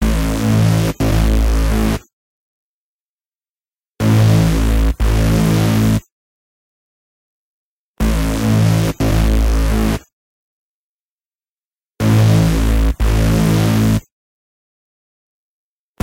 HUGE BASS
edm, LFO, Dub, free-bass, dubstep, low, Wobbles, effect, wobble, sub, bass